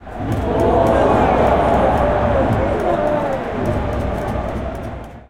nagoya-baseballregion 02
Nagoya Dome 14.07.2013, baseball match Dragons vs Giants. Recorded with internal mics of a Sony PCM-M10
Ambient, Baseball, Crowd, Soundscape